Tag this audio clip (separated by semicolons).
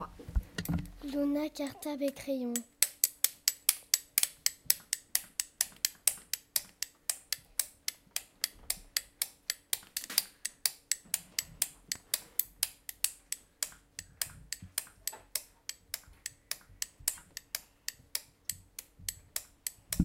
france; mysounds